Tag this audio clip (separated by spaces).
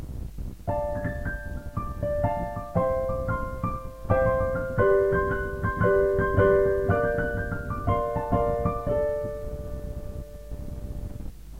Dm improvisation jazzy piano recording solo